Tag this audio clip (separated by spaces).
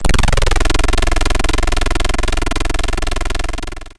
haptic synth